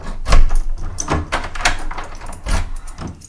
locking front door
door, locking